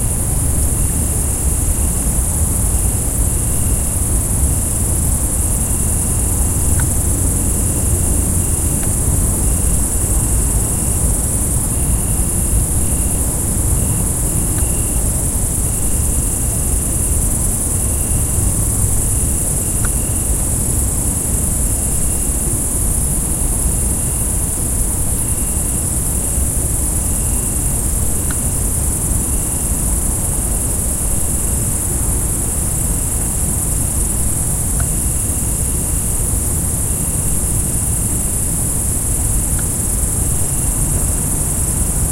This sample was recorded at schafberg by vienna in the night.